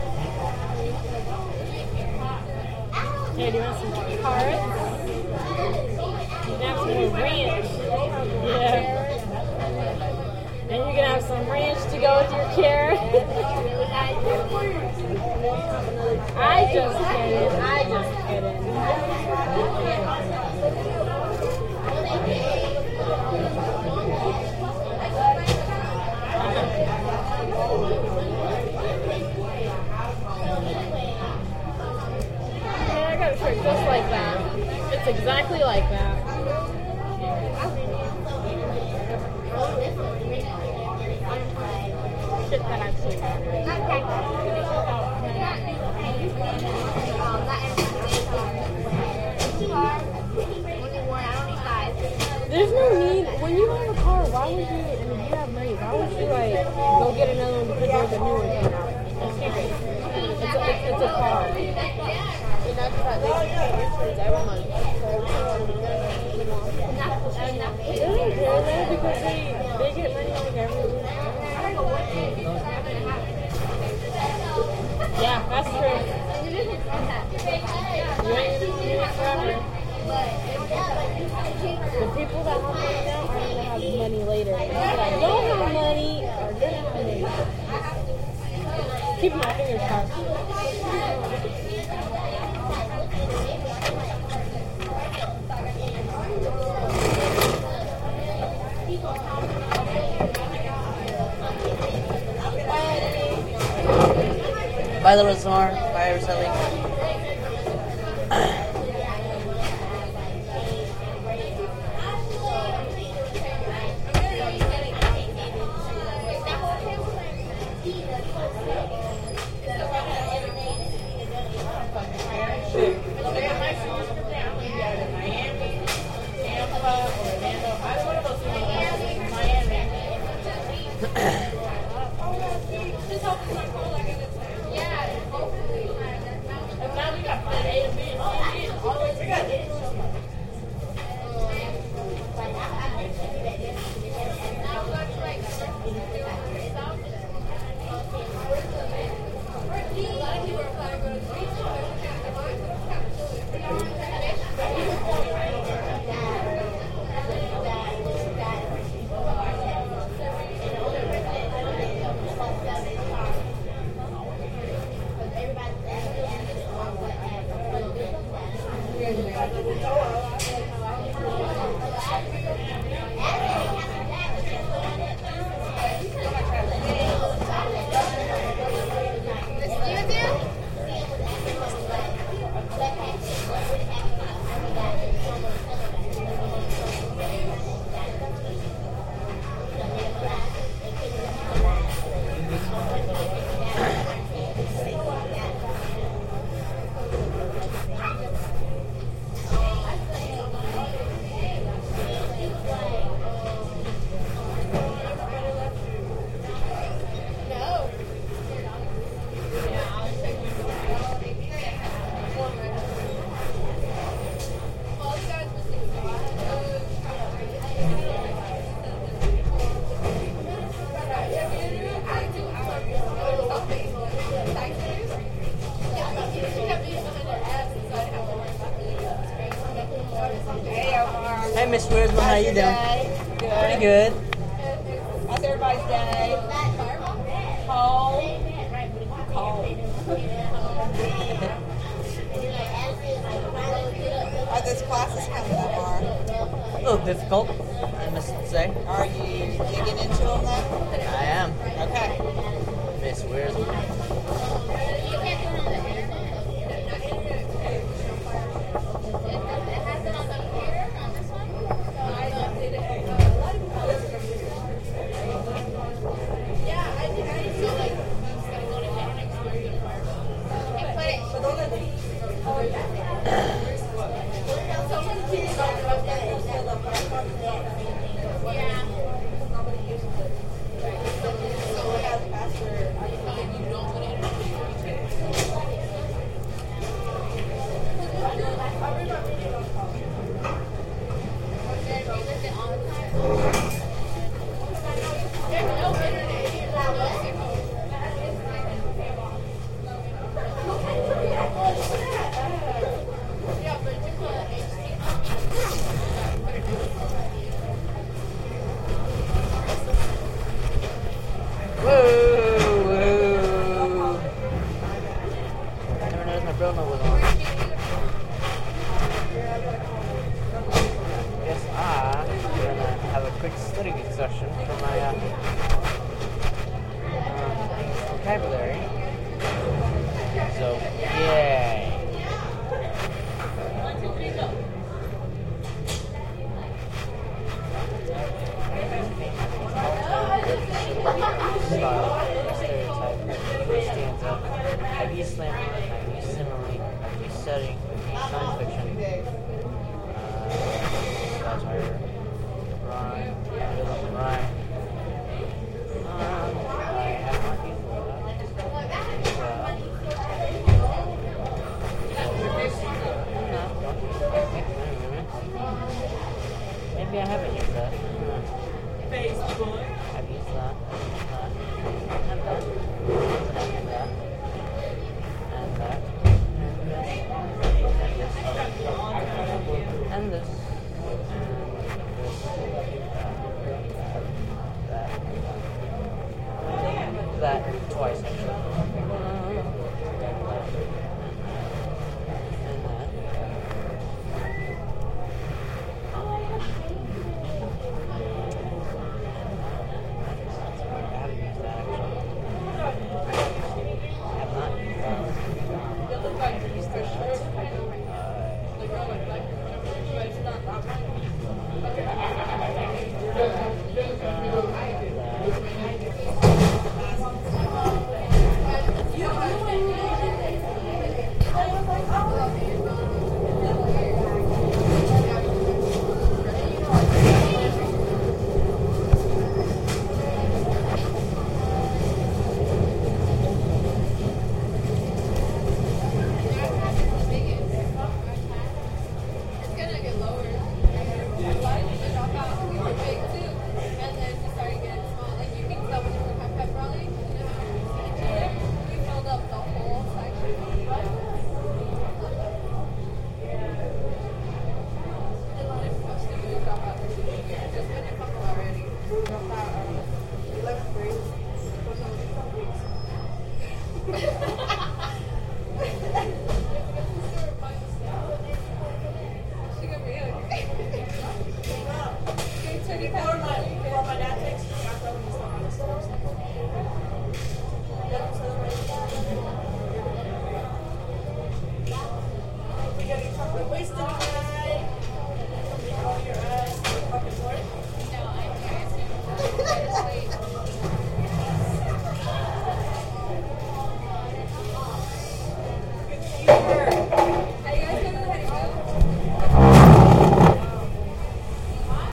A safe recording in the OHS Lunch room. I mean safe, because people are allowed to use any electronic device, During lunch.